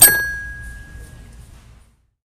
My toy piano sucks, it has no sustain and one of the keys rattles. This really pisses me off. So I went to Walmart and found me a brand new one, no slobber, no scratches, no rattling. The super store ambiance adds to the wonder.